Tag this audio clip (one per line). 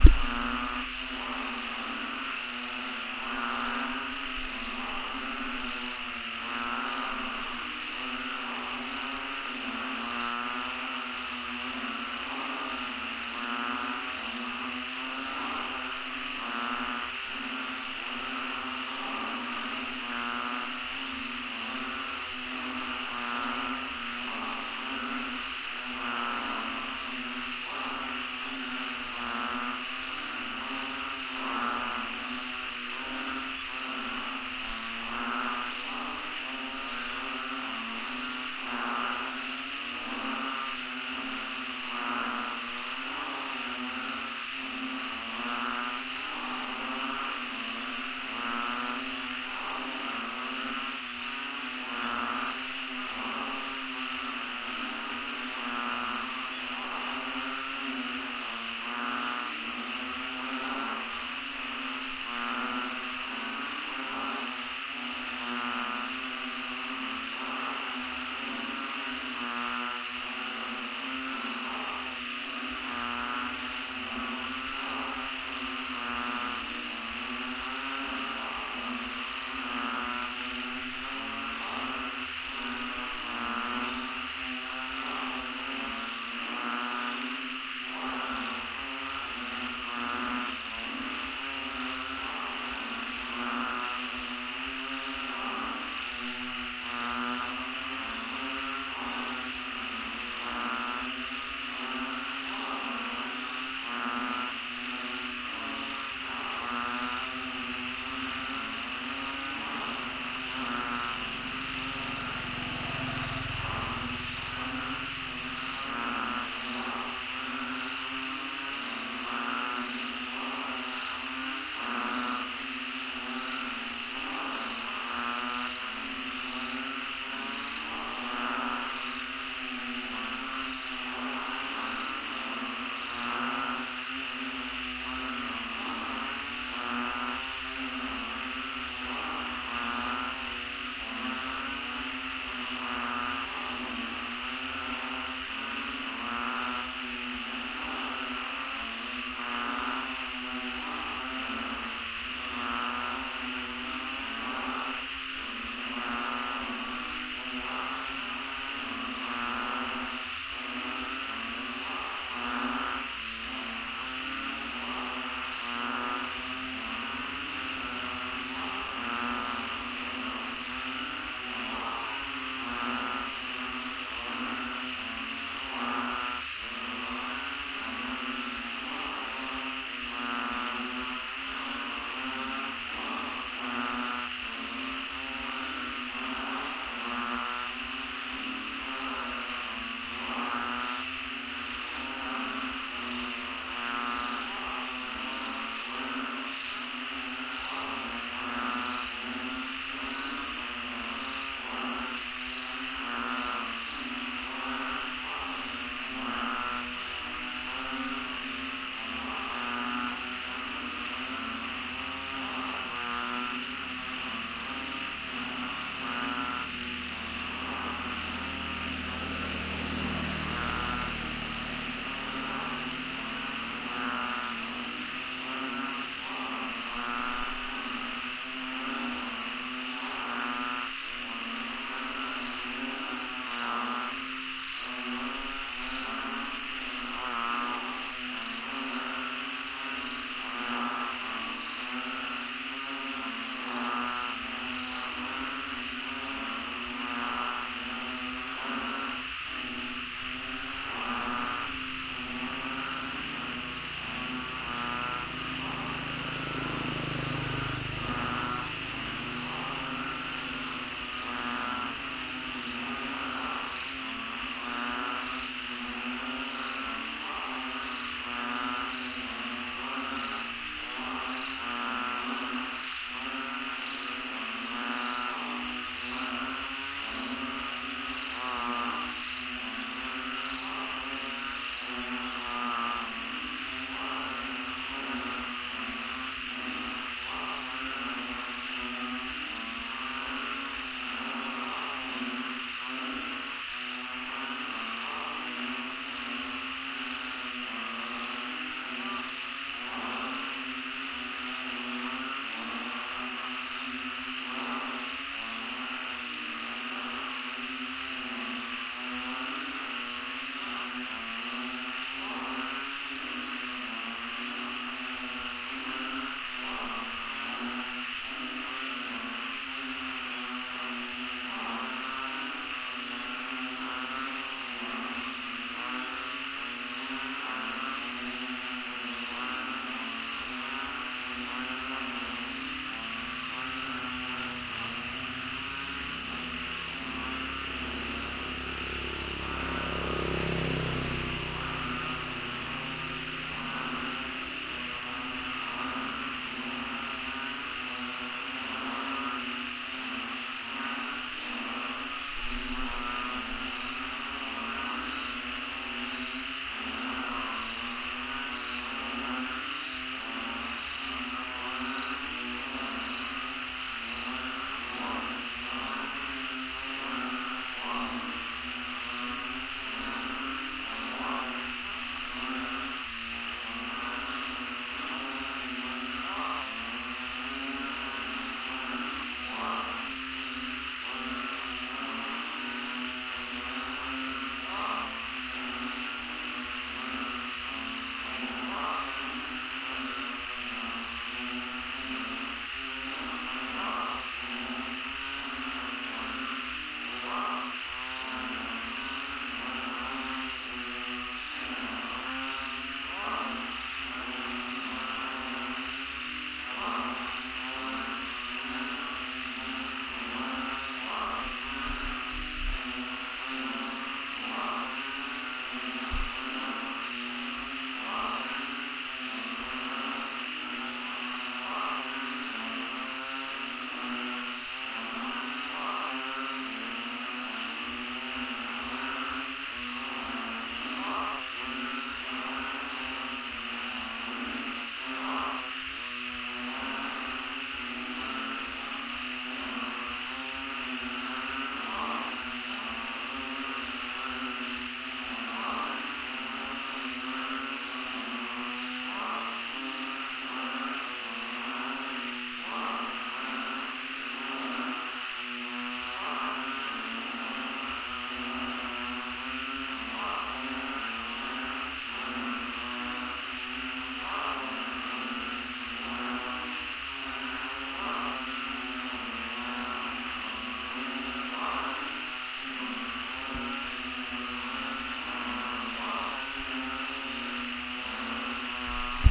Cicada
Field-reording
Nature
Thailand